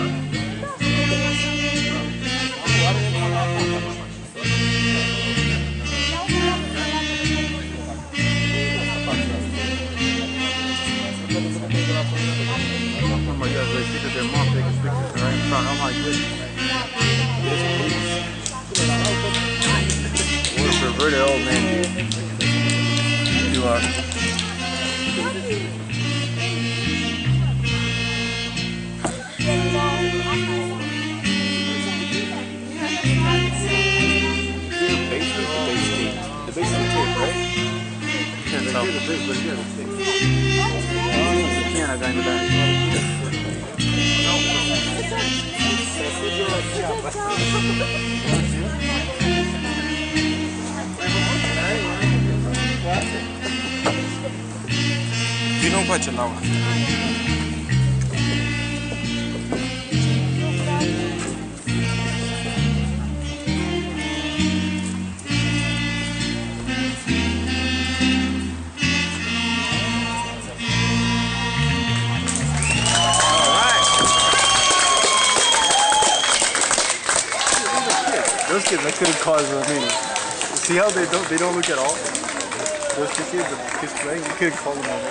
Three Saxophones at a Romanian festival
Recorded at a Romanian Spring Festival in Lilburn, Georgia.